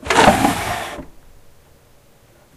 Wood Chest Slid.3
Recording of a small wooden chest being quickly dragged across the floor. Could probably double as a wooden drawer without casters being opened. Close mic'd.